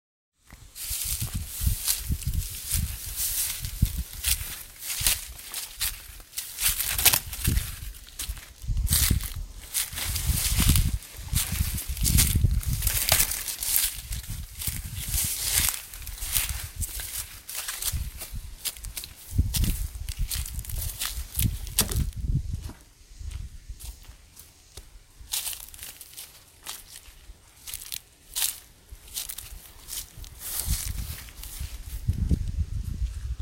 Basically a soundtrack of someone bush-walking. Contains noises such as grass rustling, leaves and foliage being pushed aside and sticks crunching underfoot, etc.. Sorry about the wind in the mic occasionally.